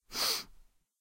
Someone breathing in through their nose. The airways aren't completely clear.
breathe, clean, inhale, smell, sniff